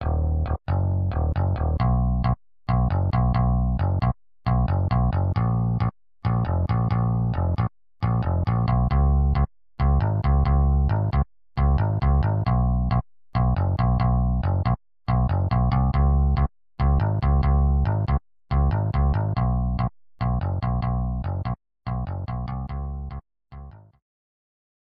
Bassesland 05 Picked Bass
Vigorous picked bass sound. Preset #4 from Bassesland VSTi Software .Notes starting from C5 produce harmonics.
Bassesland is a virtual bass software that covers a wide range of sounds, from an electric bass guitar or an acoustic double bass, to the vintage bass synthesizers.
Features
- Rotary switch selector to change between the following basses:
01 Electro Acoustic Bass
02 Fretless Electric Bass
03 Jazz Electric Bass
04 Fingered Bass and Harmonics
05 Picked Bass and Harmonics
06 Muted Bass and Harmonics
07 Slapped Electric Bass
08 Bass Slides (Glissandos)
09 Fuzz Bass Overdrive
10 Minimoog Sub-Bass
11 Moog Prodigy Bass
12 Moog System 55 Modular Synth
13 Moog Taurus Pedal Preset
14 Moog Taurus Bass Pedal Preset
15 Roland JX-3P Juicy Funk
16 Roland JX-8P Synth Bass
17 Roland Juno-106 Fat Bass
18 Clavia Nord Lead Acid Bass
19 Oberheim OB-Xa Buzz Bass
20 ARP 2600 Bass (ARP Instruments, Inc)
21 OSCar Bass (Oxford Synthesiser Company)
22 Kawai K3 Resonance Bass